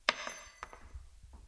pieza metalica caer
cer una llave en el suelo